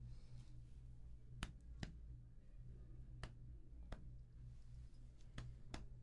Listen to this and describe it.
tap tap sound
sound, tap